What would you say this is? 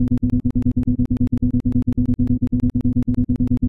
Video game text blip sound affect.
games, game, video, dialogue, blip, text, low, voice, sample